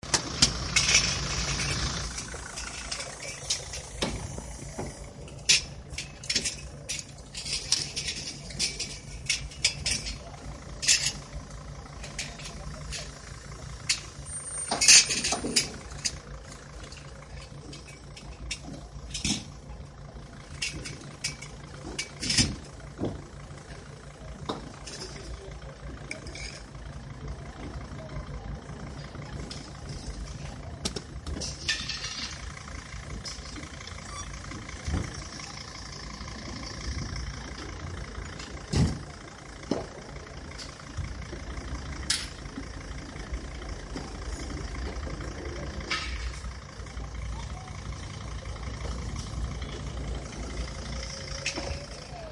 Progetto di rivisitazione di Piazza VIII Agosto a Bologna realizzata dal gruppo di studio dell'Accademia delle Belle Arti corso "progetto di interventi urbani e territoriali" del prof. Gino Gianuizzi con la collaborazione di Ilaria Mancino per l'analisi e elaborazione del paesaggio sonoro.
Questa registrazione è stata fatta venerdì di Maggio durante il mercato settimanale di Maggio alle 12:00 da Jiang Guoyin e Chen Limu